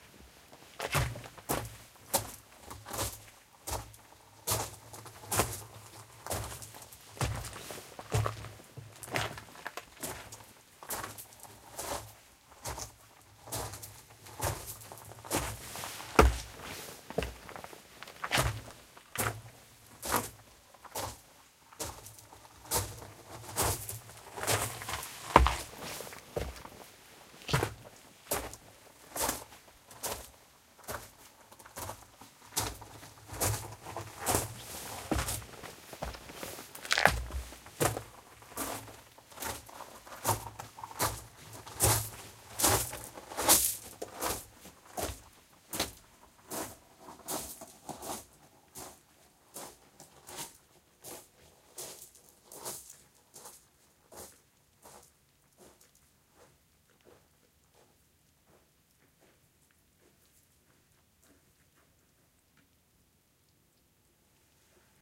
Marching off
MS stereo direct to ProTools with 2 AKG 414 mics, focusrite pre-amps. Walking with boots on gravel around the mics and then off into the night. January in Provence - peculiar ambiance
crunch, feet, disappear, marching, night, distance, provence, gravel